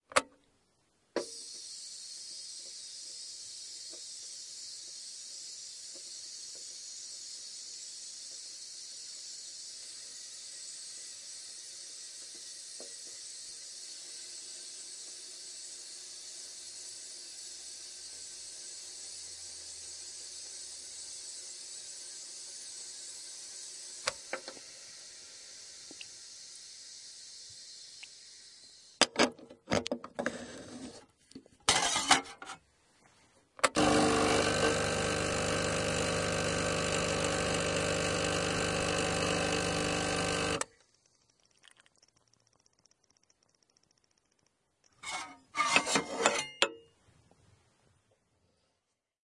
Francis Coffeemaschine
Make me a Coffee with a Francis Francis Coffeemachine.
Kaffeemaschine, leak, bead, water, machine, off, Kaffee, Maschine, dabdrip, aus, Coffeemachine, wasser, splash, drop, boiling, piston, Coffee, Tropfen, engine, cup, Kaffeemachen, making, drops, Kolbenmaschine